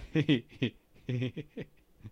Small outburst of laughter.
human, laugh, laughing, laughter, voice